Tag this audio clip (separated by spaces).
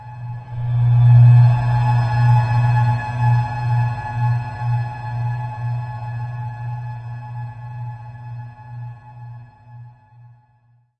deep-space long-reverb-tail